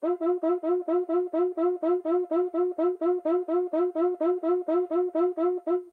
Different examples of a samba batucada instrument, making typical sqeaking sounds. Marantz PMD 671, OKM binaural or Vivanco EM35.
samba, percussion, rhythm, groove, drum, brazil, pattern